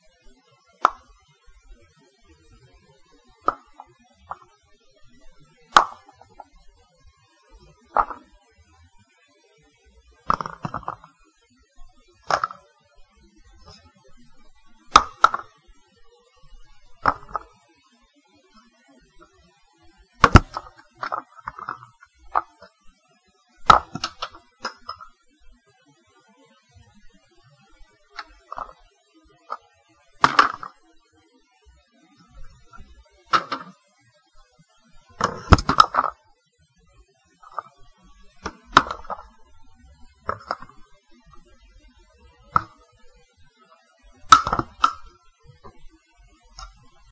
item fall drop
tossing and dropping a toilet paper dowel
drop, fall